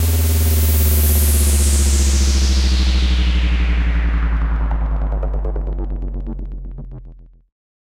Bassic Noise Sweep
effect, fx, riser, rising, sound-effect, sweep, sweeper, sweeping